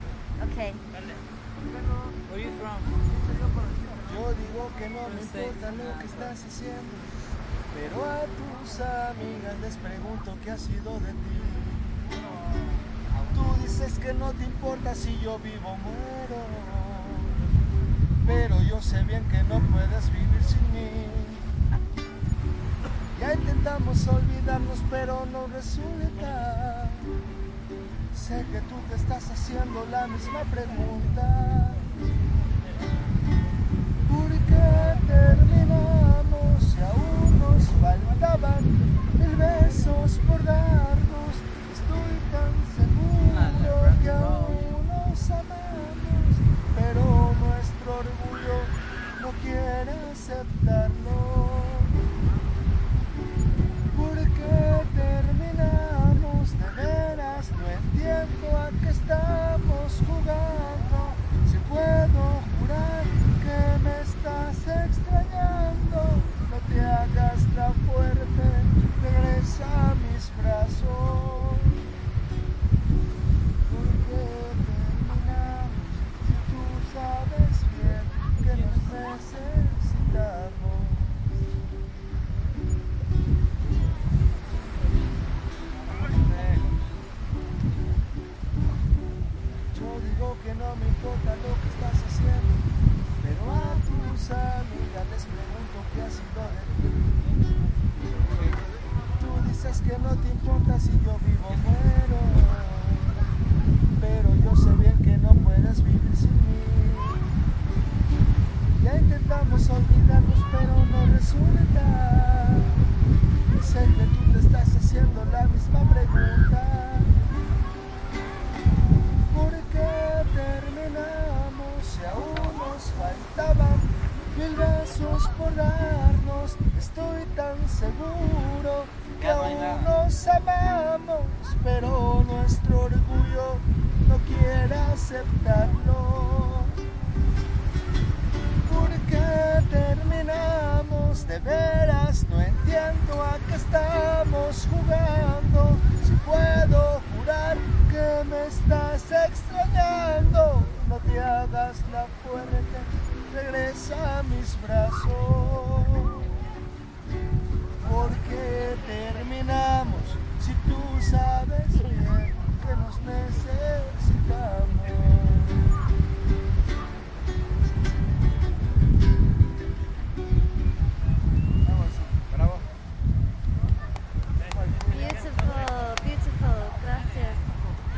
Local man sings traditional song with guitar Playa del Carmen beach
A local man sings a traditional song and plays the guitar on Playa del Carmen beach in Mexico.
beach, sings, guitar, man, Mexico, traditional-song, Playa-del-Carmen